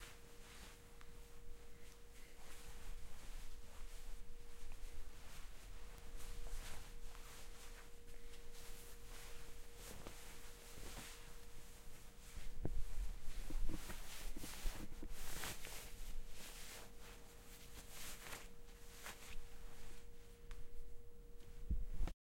Clothing movements
Sounds of clothes moving around at various tempos with various textiles.
clothes, clothing, fast, loop, material, movement, movements, OWI, rhythm, rubbing, rustle, rustling, shirt, slow, tempo, textile, texture, undress, variations, wear